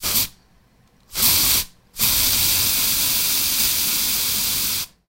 Spraying spray. short, medium and long.
spray, spray-can, spraying